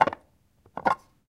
Opening a small lacquered Japanese box. (Recorder: Zoom H2.)
box, object, recording, unprocessed